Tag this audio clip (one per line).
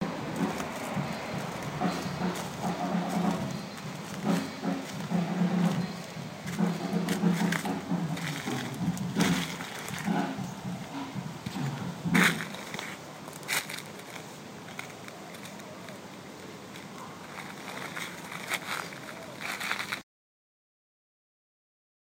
drums
footsteps
gravel
walking